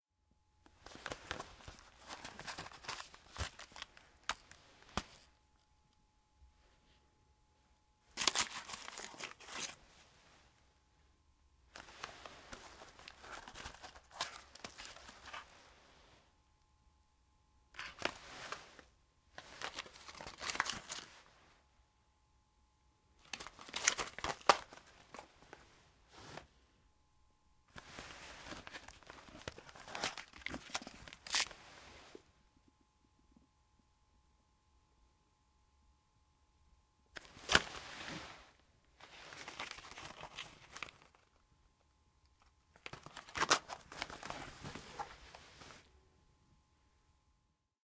FOLEY cigarete box pakla, pljuge
box, pakla, pljuge, cigarete